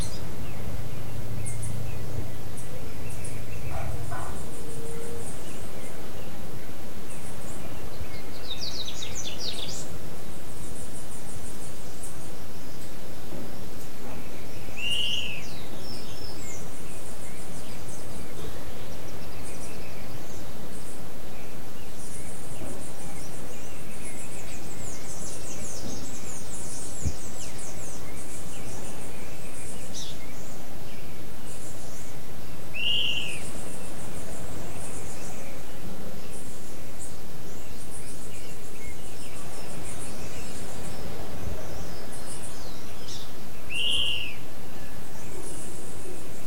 Sounds of birds singing.
As only the left channel had static, I removed it, duplicated the right channel and put it on the left.